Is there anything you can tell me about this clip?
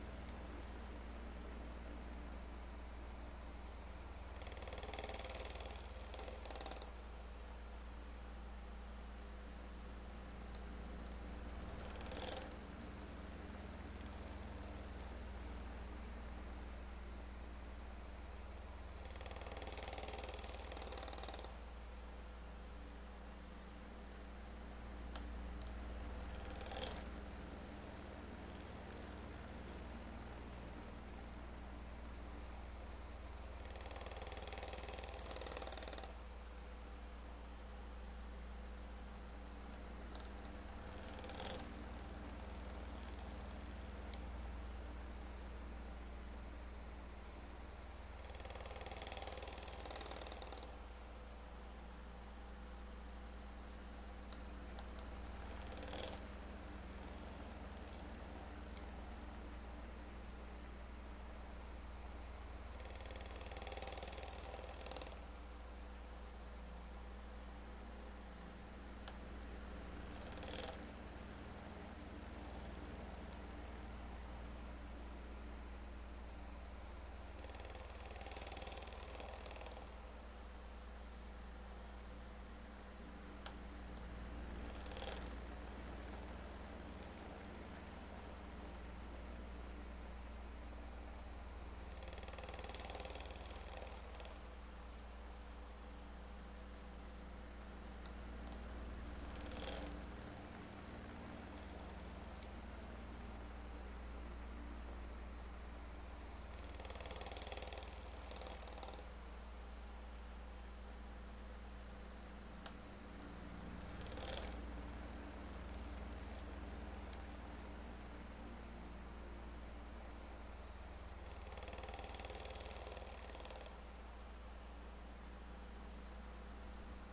old ceiling fan in a quiet room
recorded with Zoom H6, XY stereo recording
ceiling-fan, fan